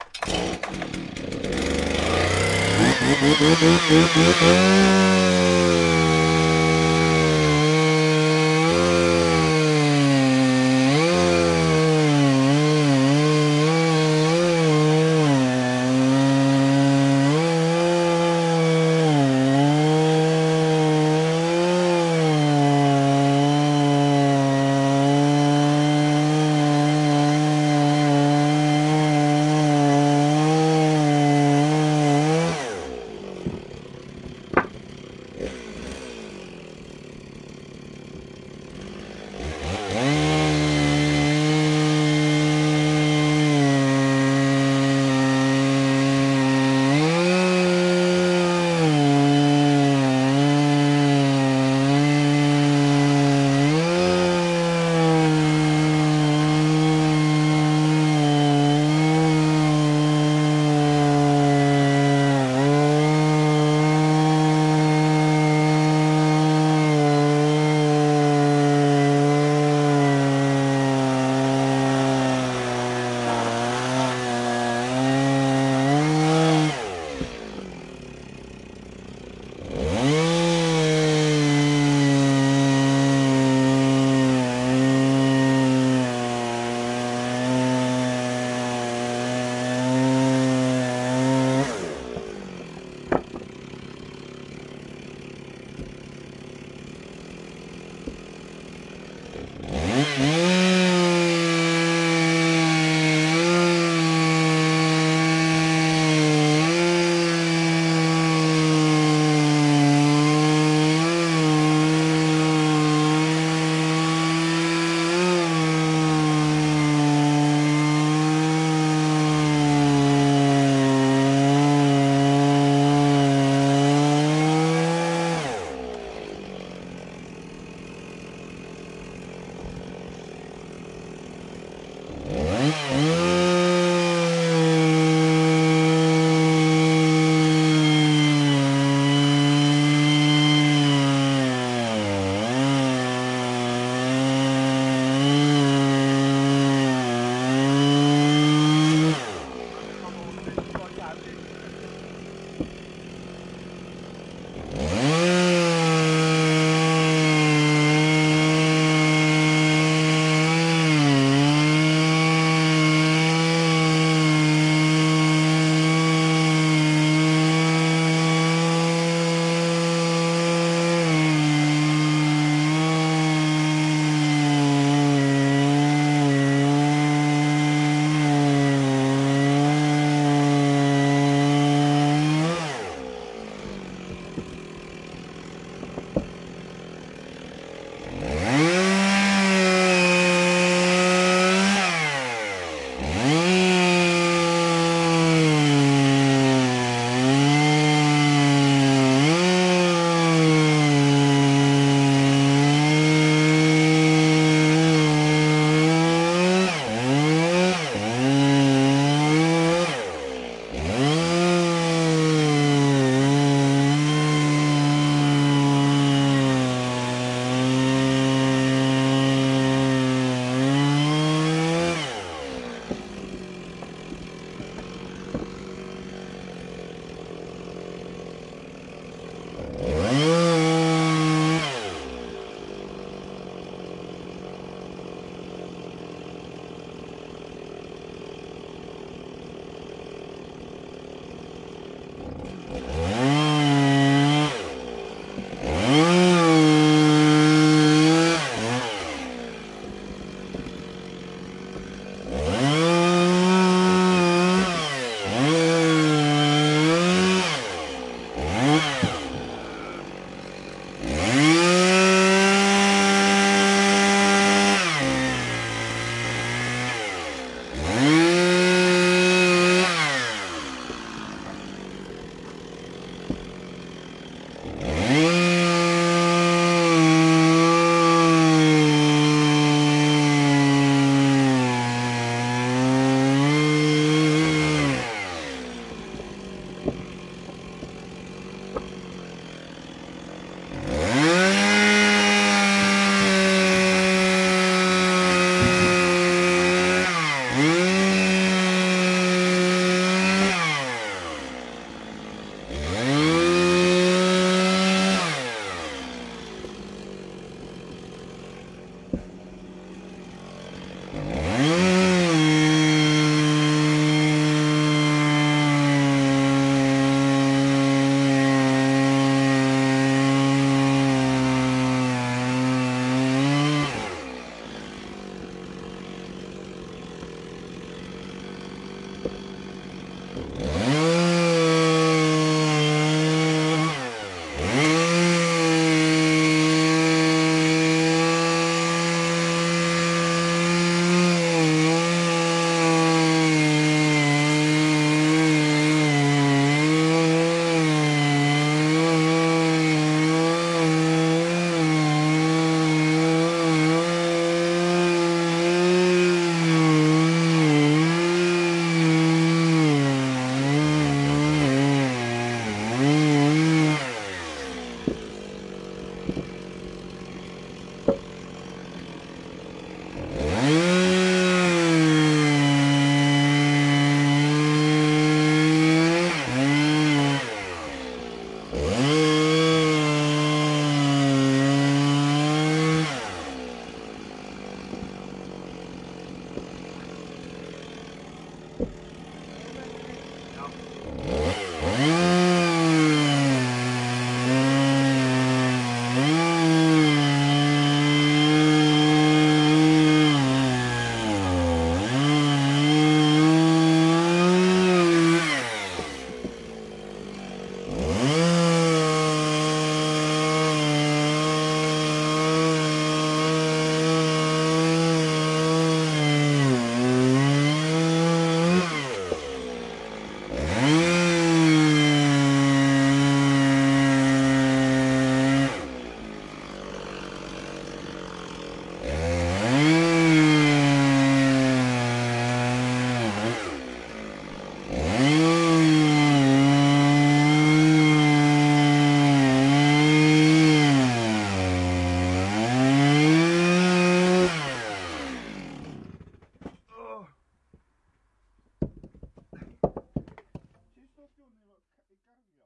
A stereo field-recording of a chainsaw crosscutting dry hardwood branches. Rode NT-4 > FEL battery pre-amp > Zoom H2 line in.